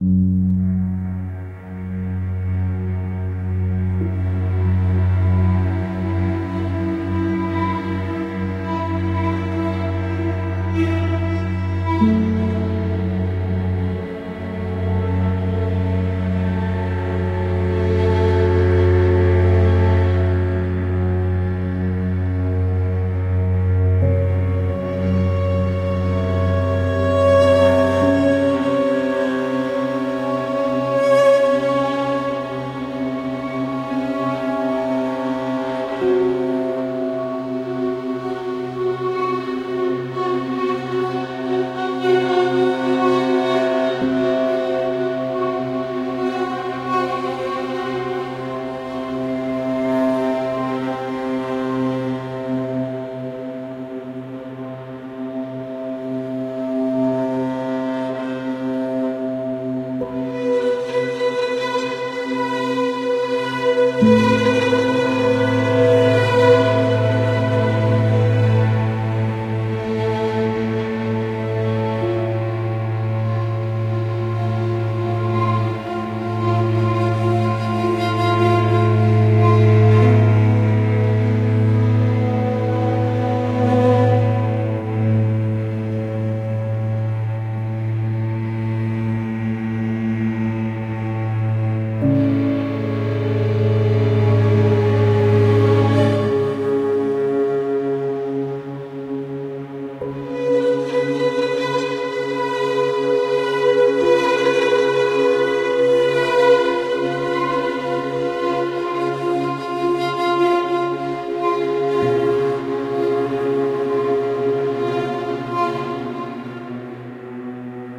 Dark Scandinavian Orchestra Slow Chill Relax Mood Sad Cinematic Atmo Amb Soundscape Surround
Sad, Cinematic, Dark, Atmo, Mood, Soundscape, Slow, Surround, Chill, Orchestra, Ambient, Amb, Relax, Scandinavian, Atmosphere